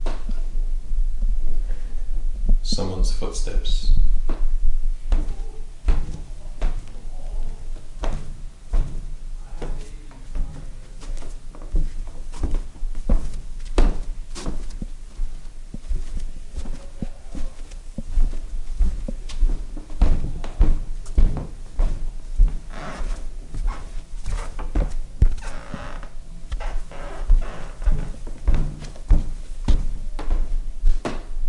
Sound 5 footsteps
creek, feet